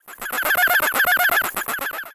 I made this sound in a freeware VSTI(called fauna), and applied a little reverb.
alien, animal, animals, creature, critter, space, synth, synthesized